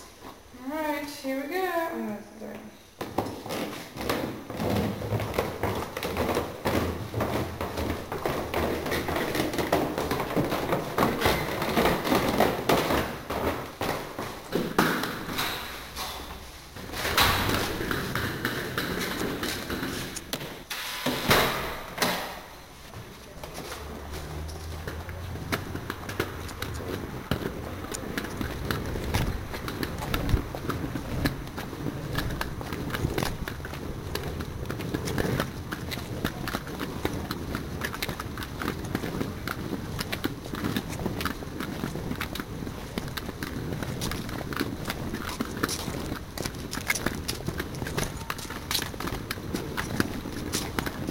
From summer 2008 trip around Europe, recorded with my Creative mp3 player.Walking out of our house in an old building, down the stairs, through the garage and out the door with suitcases and all!